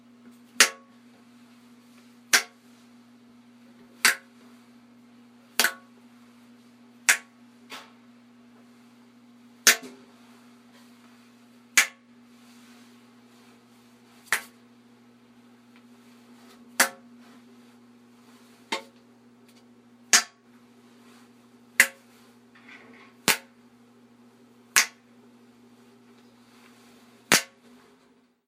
Snaps-PastryCase
snapping my fingers inside the small glass pastry case
strange, claustrophobic sharp echo-reverb thing going on here.
fingers, break, snap, weird, foley, reverb, snaps